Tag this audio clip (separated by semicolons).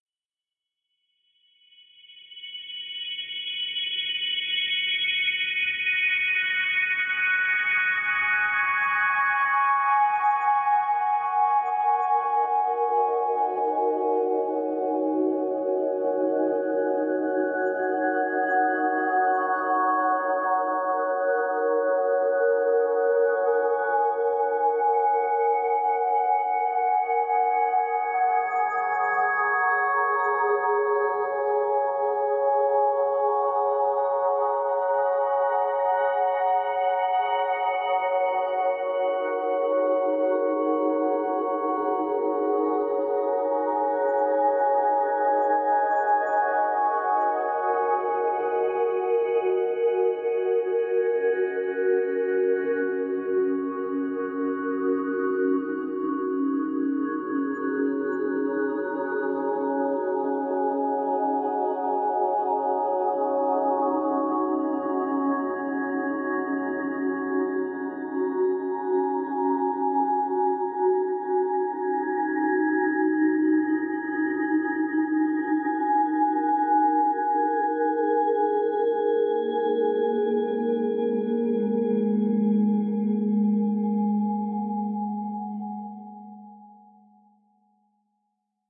ambient; evolving; pad; pentatonic; soundscape; vibe; vibraphone